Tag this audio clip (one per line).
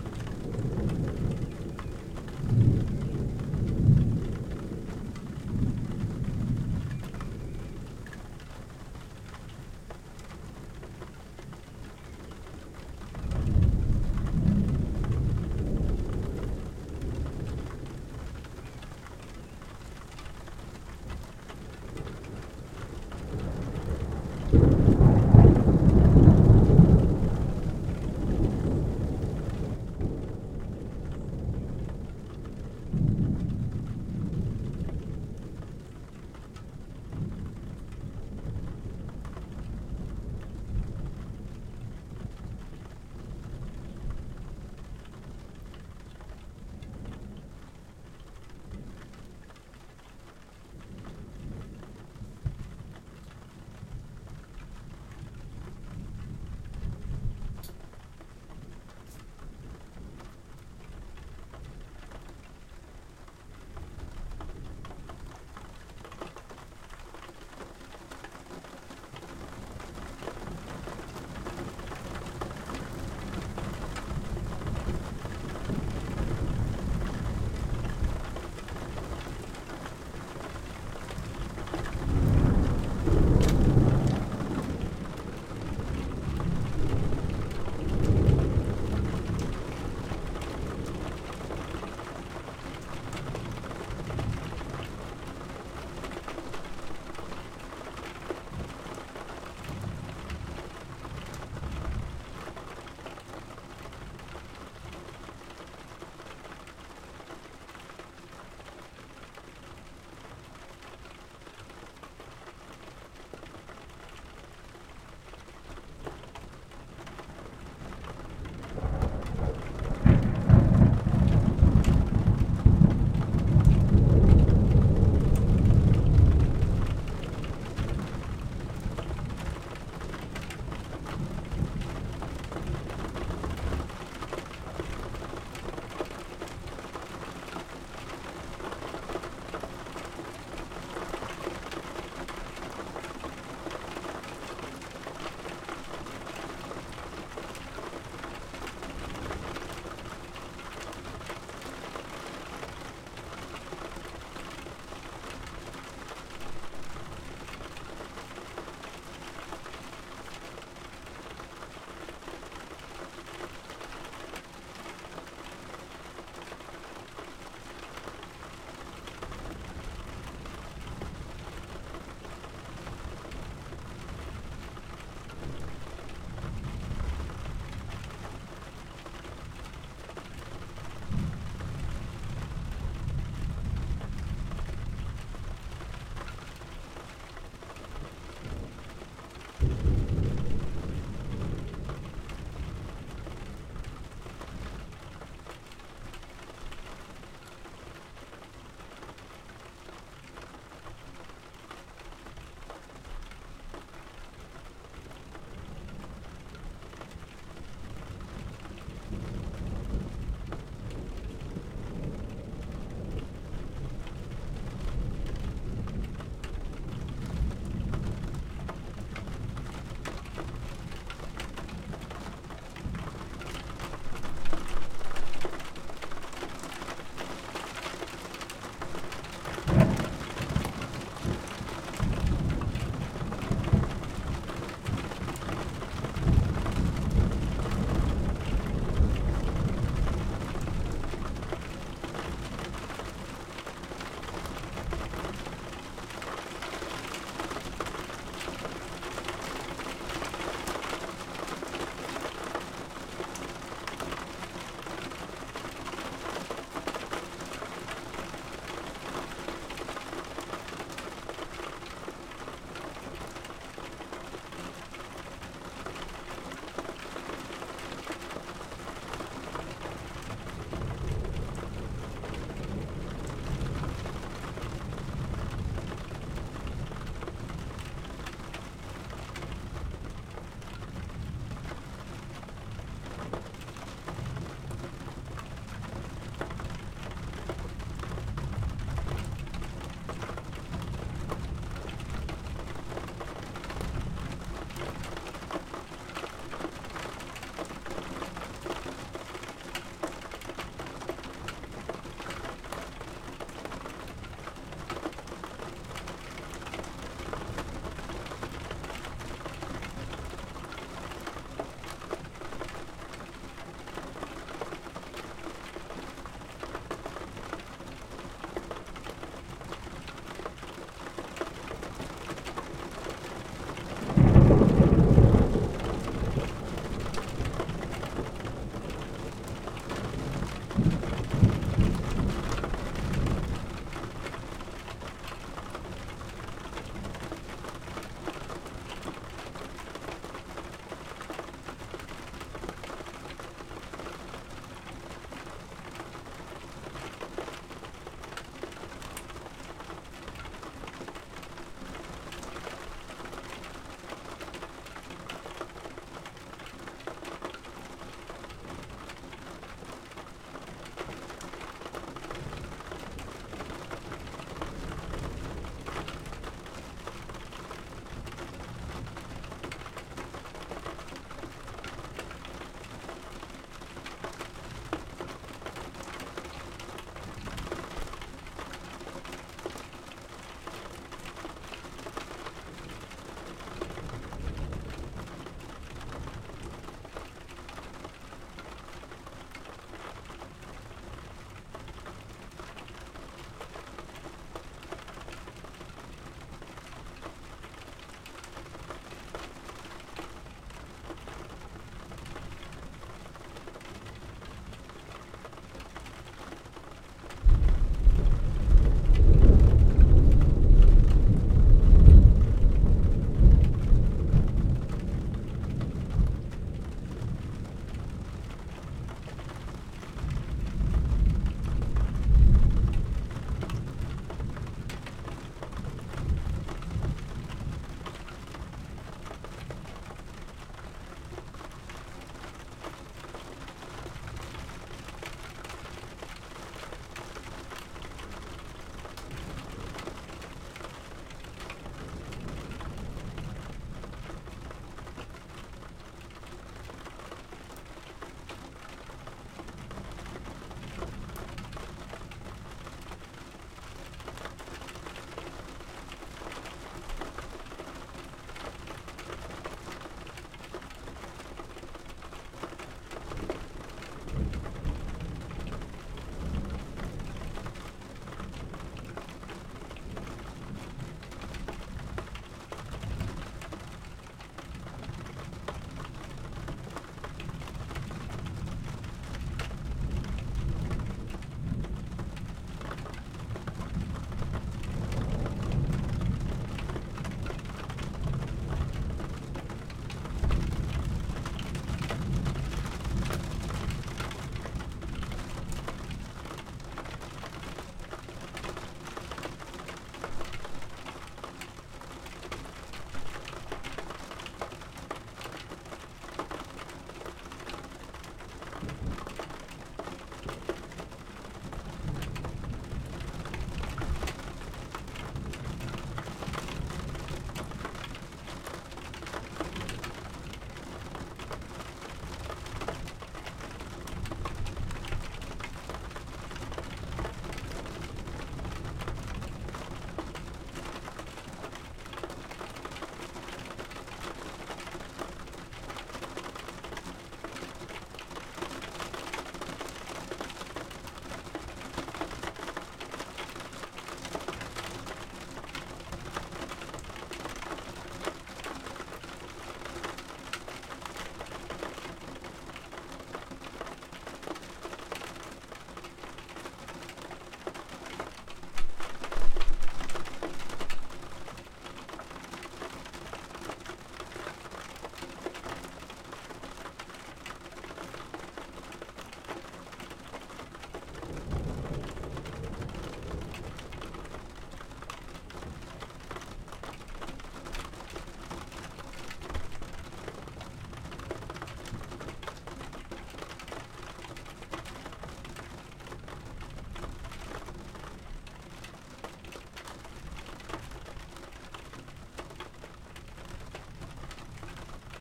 drops
hazy
raindrops
interior
velux
dark
raining